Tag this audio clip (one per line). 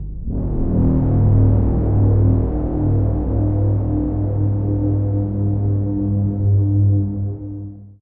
multisample
pad
reaktor